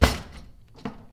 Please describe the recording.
Kitchen Drawer Close 3
One more time, the kitchen drawer closes. You can hear the cutlery shaking.
Recorded with Sony TCD D10 PRO II & Sennheiser MD21U.
close, closes, closing, cutlery, forks, iron, kitchen, kitchendrawer, knifes, slam, smack, spoons